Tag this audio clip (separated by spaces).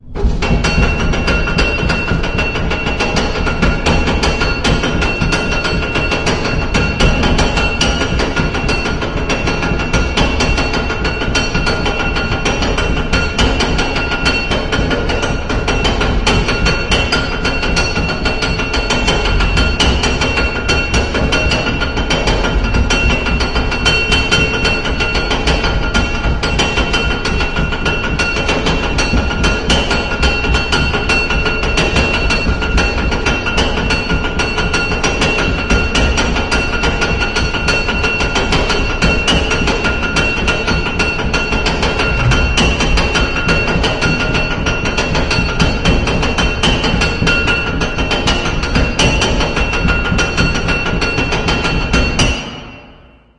heavy dark creepy bolt chain scary metal metallic imprisonment monster dungeonmaster prison iron loop seamless drawbridge bridge dungeon